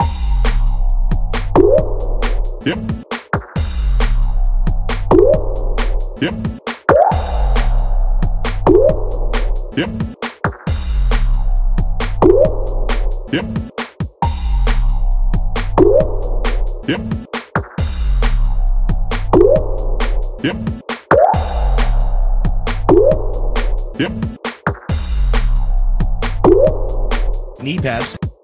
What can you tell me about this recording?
Kneepads 135bpm 16 Bars
Minimal beat with samples. It's all about the kneepads!
Samples, Mixes, Beats